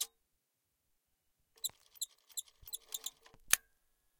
Queneau machine à coudre 01
son de machine à coudre
coudre, industrial, machine, machinery, POWER